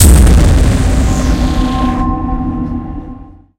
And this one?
Big Hit
An impact I did in MetaSynth.
metasynth, thud, impact, synth, hit, noise